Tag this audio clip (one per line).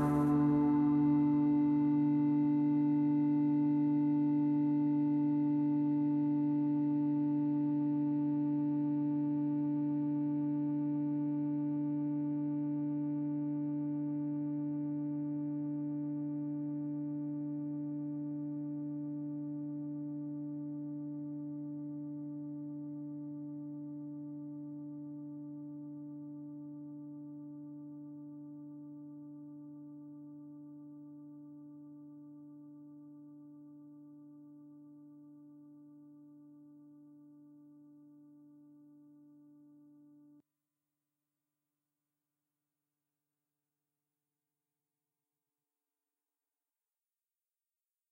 Fade-Out
Note
Fade
Drone
Out
Synth
Pad
D